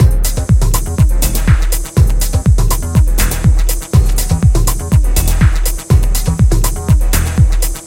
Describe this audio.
Linearity Beat Part 10 by DSQT 122Bpm
This is a simple techno loop targeting mainly DJs and part of a construction pack. Use it with the other parts inside the pack to get a full structured techno track.